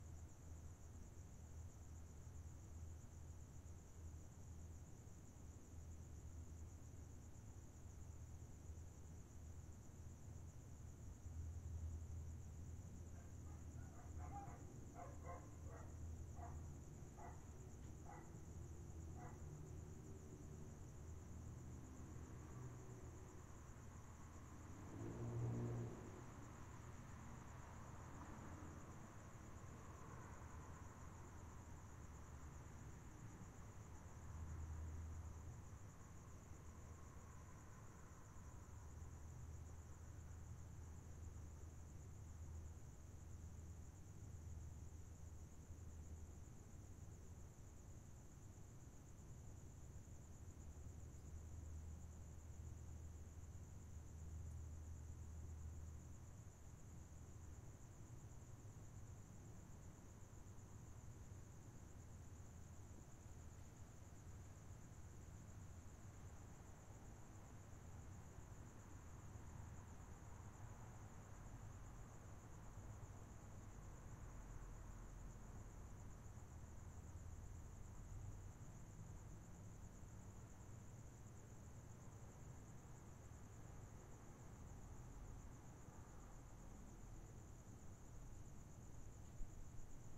Outside in the late evening in North Park, has sounds of faraway traffic.
night, north, park